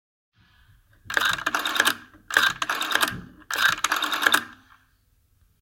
A sample of and old phone with rotary dial when dialing 112. Recorded with samsung mobile phone. Some ambient noise is heard.
112, rotary, telephone, phone, antique, dial